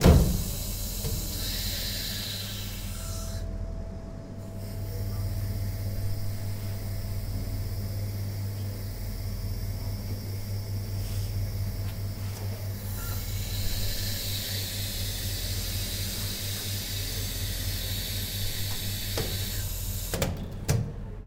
Elevator Commands Noises
The sound of the controls of an elevator.
Command, Elevator, Lift